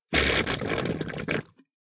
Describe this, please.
suck in 9
various sounds made using a short hose and a plastic box full of h2o.
blub, bubble, bubbles, bubbling, drip, gurgle, liquid, suck, sucking, water, wet